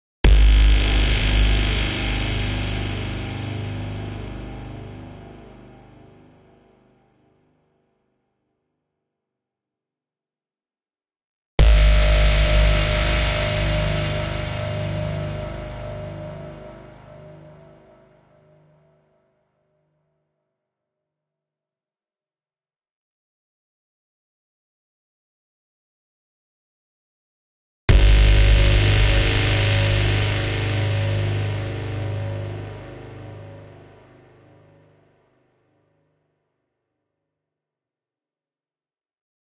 Trailer Super Hits
Huge trailer hits with long tails.